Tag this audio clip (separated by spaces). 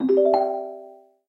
music cell sound bloop ambient desktop harmony computer sfx tone bleep click correct application blip ringtone videogame alert game incorrect tones noise notification event melody effect chime indie-game